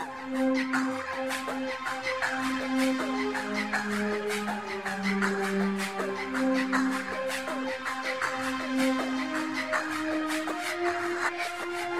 ooh ahh processed
vocal with delay and I've just reversed the end of the sample